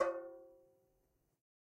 Metal Timbale right open 010
home, conga